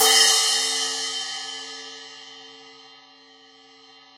RC13inZZ-Ed~v08
A 1-shot sample taken of a 13-inch diameter Zildjian Z.Custom Bottom Hi-Hat cymbal, recorded with an MXL 603 close-mic and two Peavey electret condenser microphones in an XY pair. This cymbal makes a good ride cymbal for pitched-up drum and bass music. The files are all 200,000 samples in length, and crossfade-looped with the loop range [150,000...199,999]. Just enable looping, set the sample player's sustain parameter to 0% and use the decay and/or release parameter to fade the cymbal out to taste.
Notes for samples in this pack:
Playing style:
Bl = Bell Strike
Bw = Bow Strike
Ed = Edge Strike
1-shot, cymbal, multisample, velocity